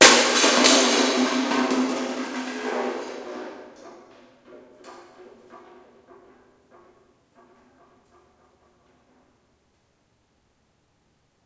Kicking empty paint can 3
Exactly as described. Kicking a paint can.
paint, kick, mic, can